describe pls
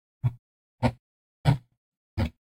Scrolling with computer mouse scroll wheel
Scrolling with a computer mouse scroll wheel
scrolling, MUS152, mouse, scroll, computer, wheel